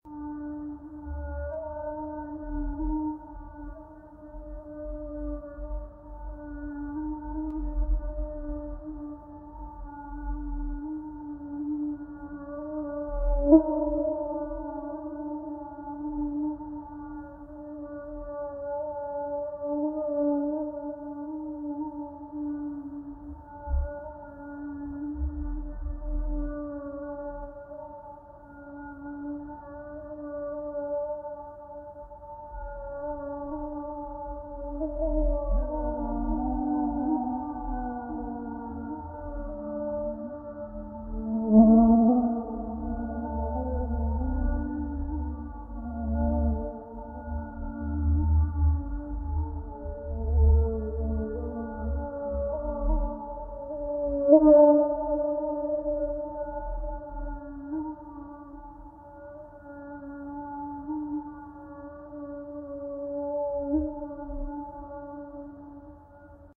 I recorded a mosquito and had it fly around in a grand church
afterwards. After a while the little animal is joined by another one
and they sing together for a few seconds.(Mosquito recorded with my
solid state recorder.)
buzzing, church, insect, mosquito, praying, preying